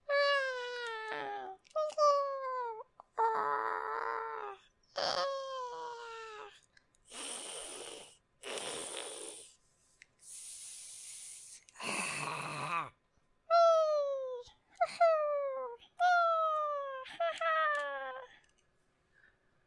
Happy and Sad Flower Creatures
Tiny little flower creatures being sad in the beginning, hissing and angry in the middle and joyously shouting in the end.
creature, fae, fey, flower, Happy, hiss, joy, pain, sad, sadness